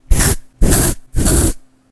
Deodorant spray
Deodorant, effect, spray